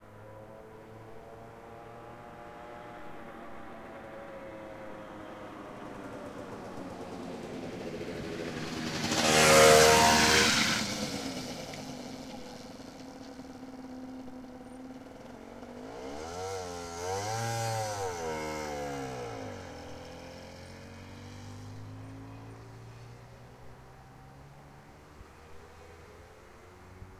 motorcycle arriving at crossways wet street
a motorcycle, that is arriving at an empty crossway, stopping and continue driving. stereo record on a wet street surface after a rainy day
crossway, engine, moped, motorbike, motorcycle, scooter, street